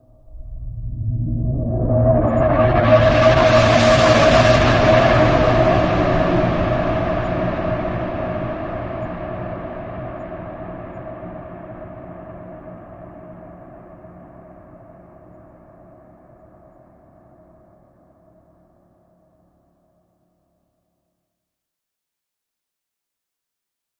Ghosts moaning
Alien, Creepy, Fiction, Ghost, Growl, Halloween, Machine, Movement, Nightmare, Outer, Scary, Science, Scifi, Sci-Fi, Space, Spaceship, Spooky, Strange, Voices